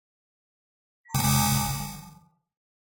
Glitchy alarm I made using white noise and Reaper